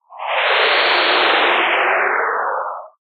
Synthetic sound.
Made in Coagula.